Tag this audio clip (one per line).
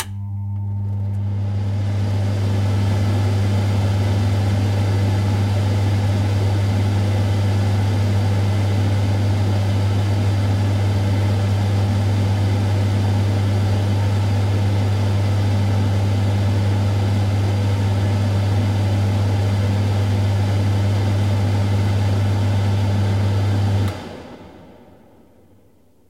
fan
refrigerator
vent
air-conditioning
wind
air
ac
ventilator
fridge
extractor